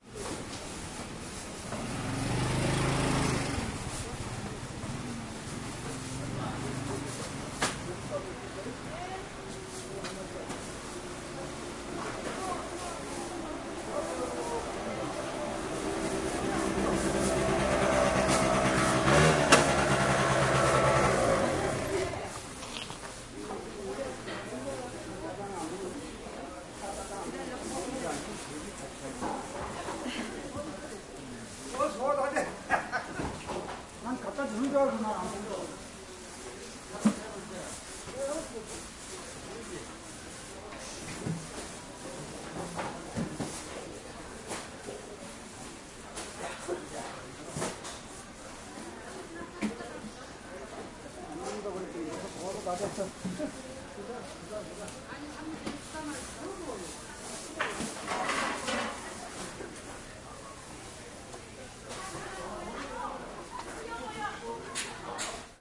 0189 Yeongdeungpo Market Motorbike
Motorbike in the market. People talking Korean.
20120215
engine, field-recording, korea, korean, motorbike, seoul, voice